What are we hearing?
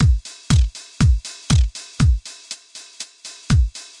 A collection of sounds created with Electribe MX1 in Vemberaudio Shortcircuit, some processing to get Toms & Hats, and a master multiband limiter to avoid peaks.
Col.leció de sons creats amb una Electribe MX1 samplejats i mapejats en Vemberaudio Shortcircuit, on han sigut processats per obtenir Toms, Hats i altres sons que no caben dins dels 9. Per evitar pics de nivell s'ha aplicat un compressor multibanda suau i s'ha afegit una lleugera reverb (Jb Omniverb) per suavitzar altres sons.
Enjoy these sounds and please tell me if you like them.
Disfrutad usando éstos sonidos, si os gustan me gustará saberlo.
Disfruteu fent servir aquests sons, si us agraden m'agradarà saber-ho.
Electribe, EMX1, JuliusLC, Shortcircuit, StudioOne, Vemberaudio